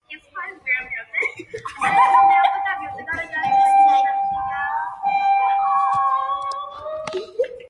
A School Bell
kids, bell, school